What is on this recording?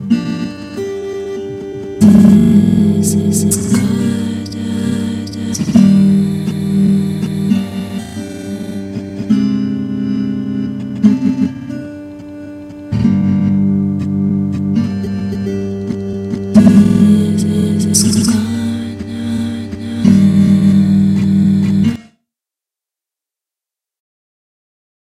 discordant clip
this is a clip taken from my song Discordant. Played on acoustic guitar - I took the file and overproccessed it with some effects
voice, glitch, experimental, female, acoustic, guitar, sample, vocal